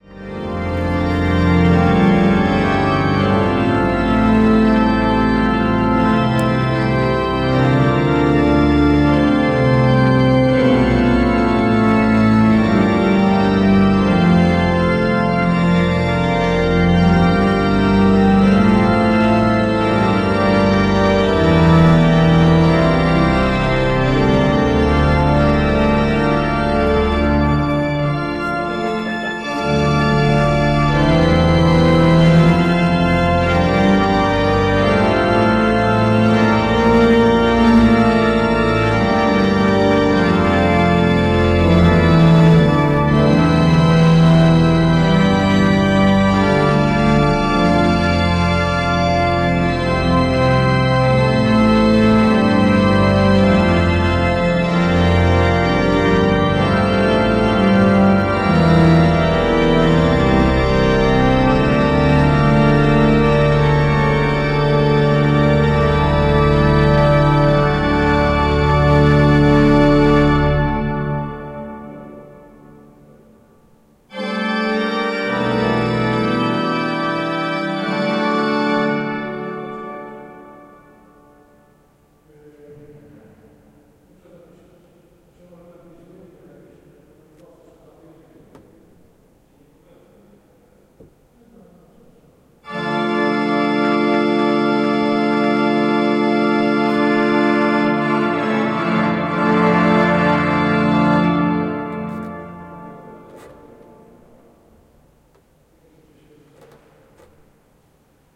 07.05.2011: about 11.40. pipes in the Zmartwychwstania Church on Dabrowki street in Poznan/Poland.
music, pipes, poland, church, test, pipe, field-recording, poznan